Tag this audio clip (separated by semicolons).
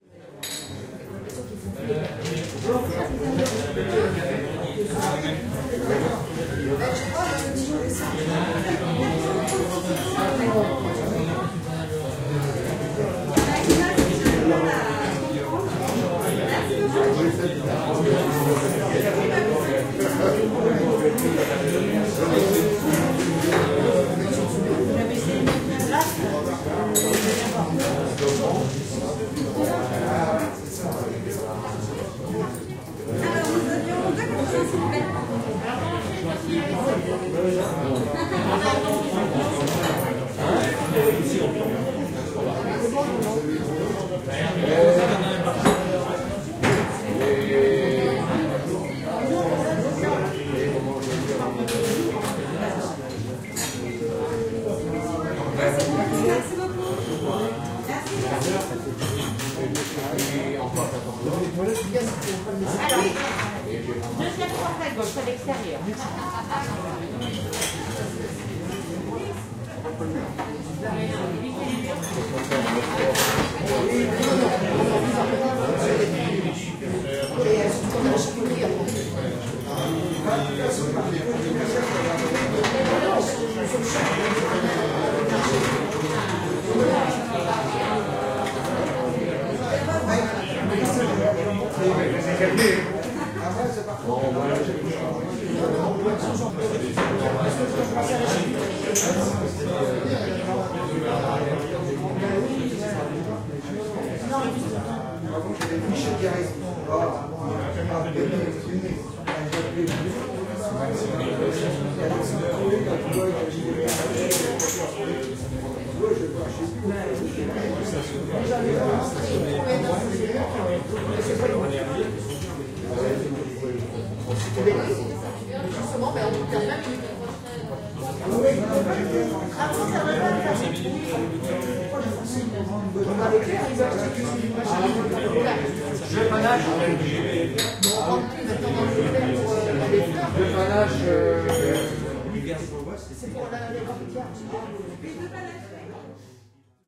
cafe; coins; field-recording; cup; voices; village; coffee; talking; market; francais; speak; people; bar; french